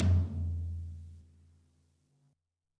Middle Tom Of God Wet 001
drum, kit, set, drumset, pack